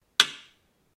Bang sound effect
Bang, bump, thud, thump